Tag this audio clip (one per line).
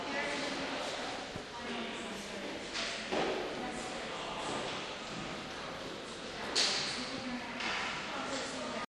independence-hall
city
philadelphia
field-recording